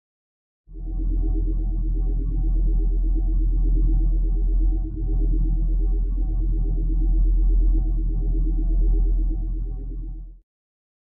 Energy, vortexes, field, sci-fi, pulses.